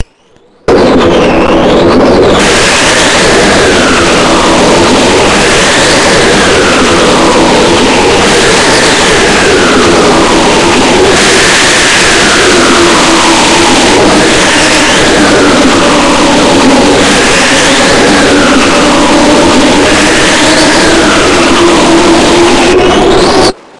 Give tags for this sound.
cubase-processed
field-recording
lightening
thunder-storms

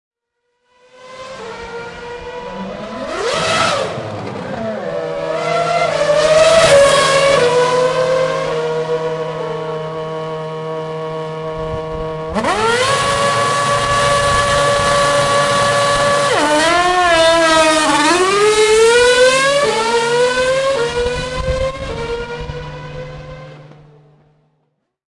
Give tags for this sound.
field-recording
racing
formula-1
engine
formula-one
race
car
ambience
revving
accelerating
noise
zoomh4
sound
f1

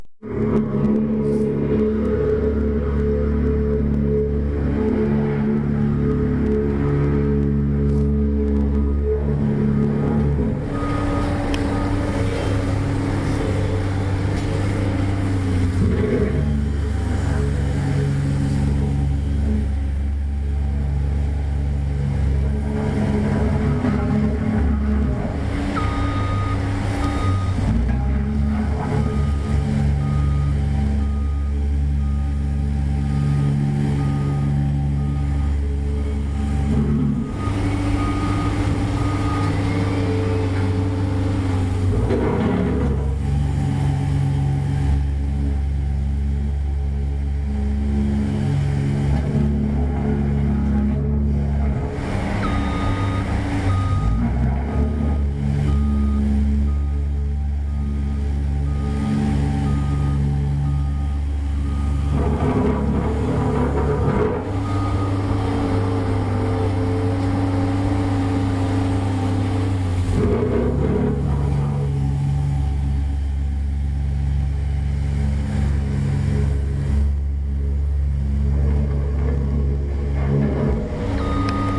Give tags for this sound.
steamroller
bass
paving
machine
roller
environmental
beeping
tractor
drone
crew
construction
city
urban
road
steam-roller
engine
equipment
pavement
hum
environment

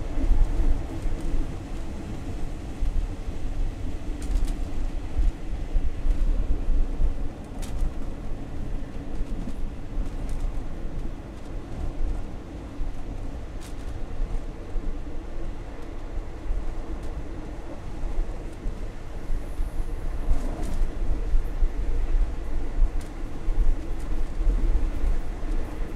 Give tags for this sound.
street
temples
machines
thailand

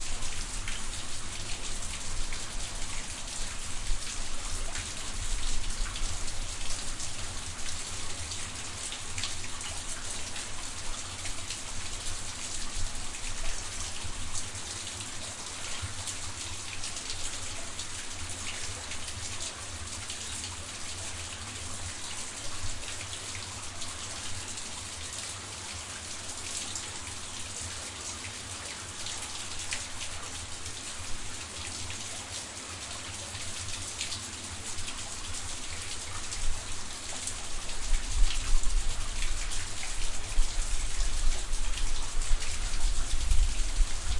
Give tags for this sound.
trickle
splash
water
suburbs

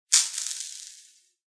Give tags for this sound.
cave
crumble
dust
gravel
scatter

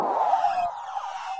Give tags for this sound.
laser
zap

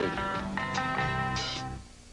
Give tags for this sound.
musical
Dukes
break
Hazzard
transition

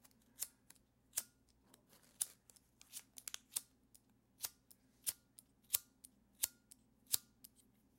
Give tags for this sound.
fire
school-project
elements
xlr

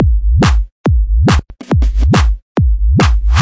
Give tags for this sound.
chords
sounds
samples
synthesizer
game
synth
video
loop
melody
music
sample
loops
awesome
drums
digital
drum
hit
8-bit

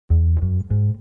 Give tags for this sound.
base
guitar

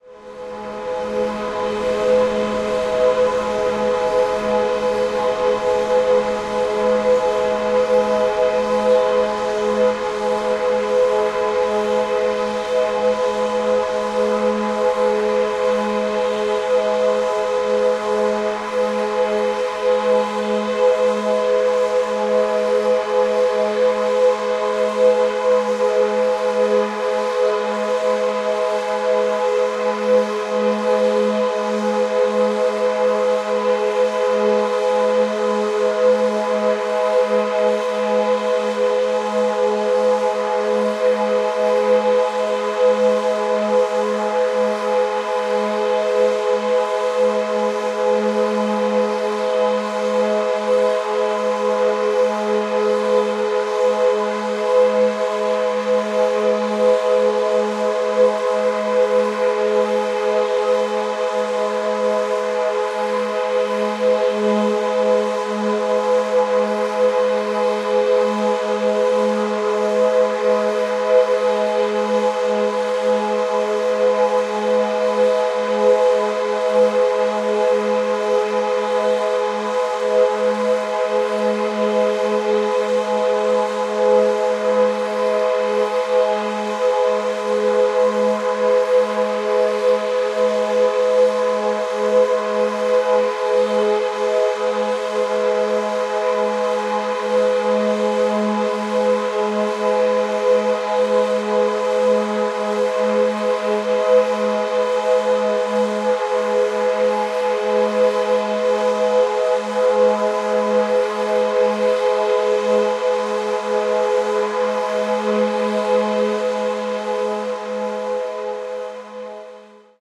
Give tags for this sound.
ambient
drone
effect
electronic
reaktor
soundscape

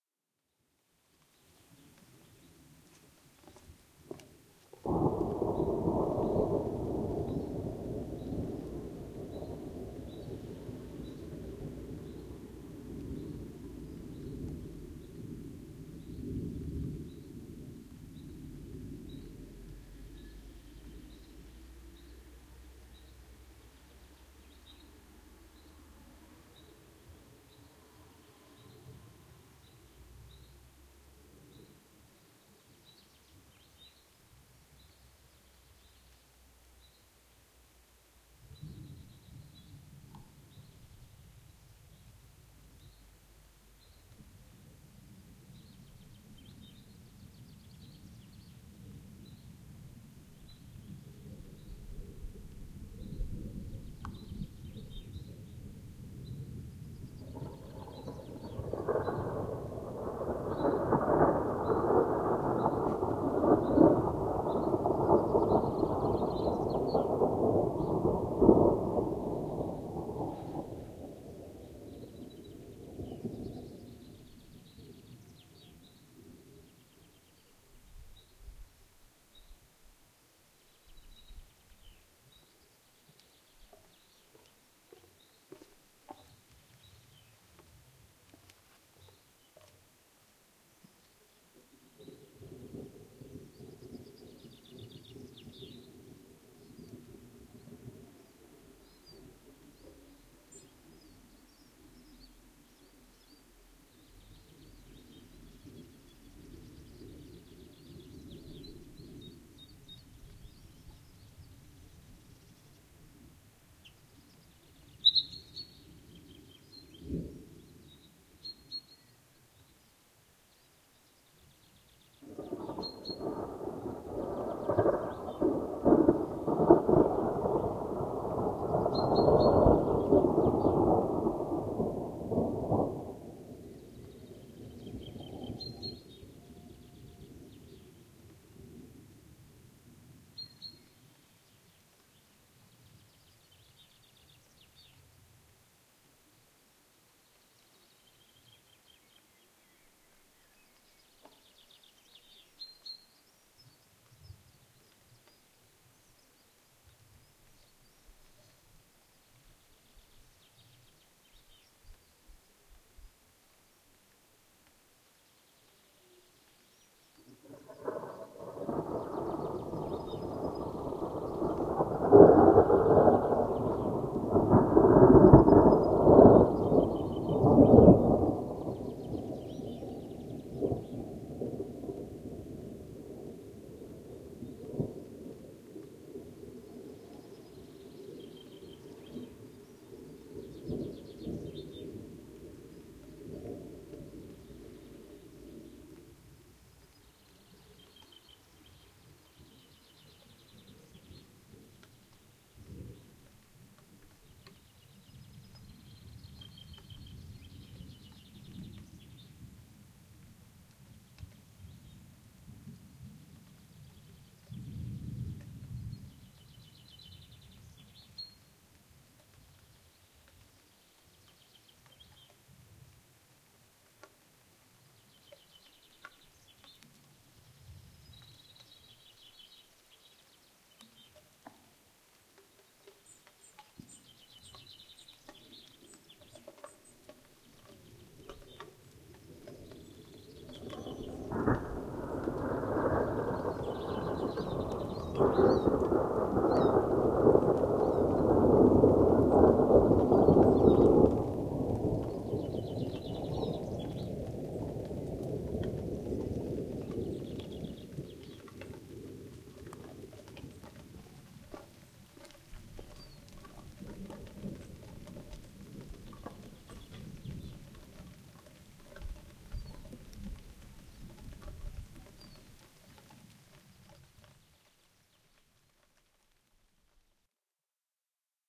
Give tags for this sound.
birds
field-recording
plops
rain
snowdonia
stereo
thunder
wales
xy

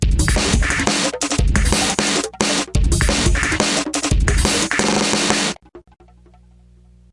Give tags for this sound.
176bpm
bass
drum
emx-1
fast
hardware
loop

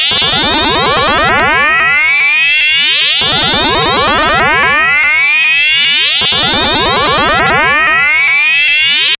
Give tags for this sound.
drone
experimental
noise
sci-fi
soundeffect

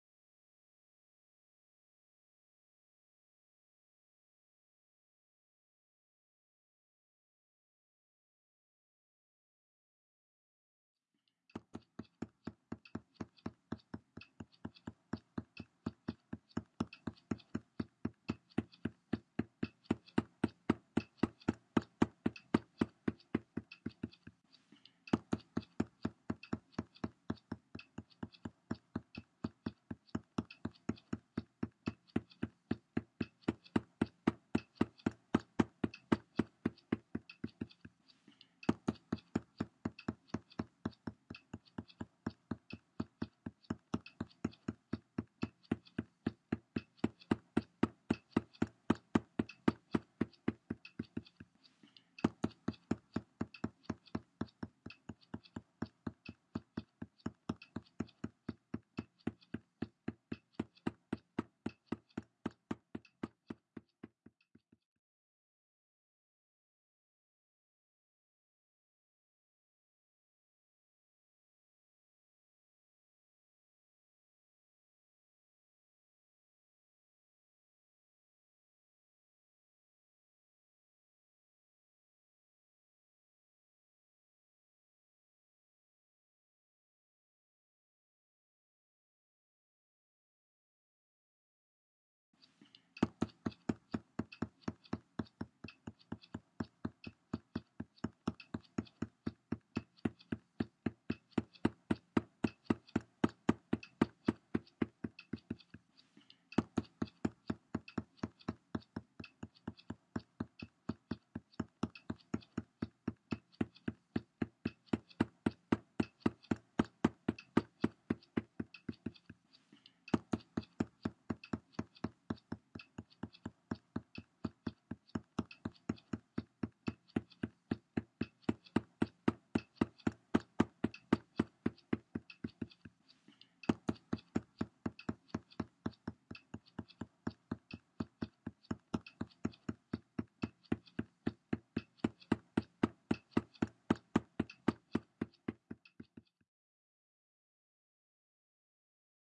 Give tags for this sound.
Tapping
Computer
MacBook

